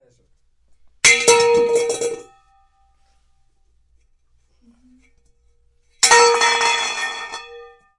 48. objeto grande de metal callendo
caer, grande, metal